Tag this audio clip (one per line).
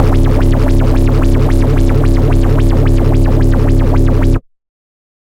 loop
effect
dubstep
110
dub-step
club
noise
sub
porn-core
wub
dub
beat